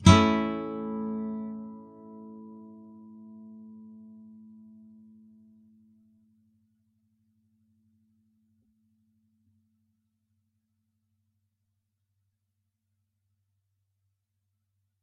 A thin strs
Standard open A Major chord but the only strings played are the E (1st), B (2nd), and G (3rd). Up strum. If any of these samples have any errors or faults, please tell me.
clean, open-chords, acoustic, nylon-guitar, guitar